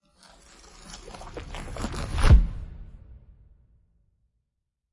Spinning Book Closes
book closes with theatrical page flipping
book, book-close, impact, page, paper, thud, turning, wood